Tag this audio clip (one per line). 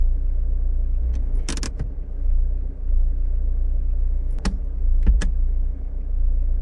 car
engine
hand-brake
put-on
release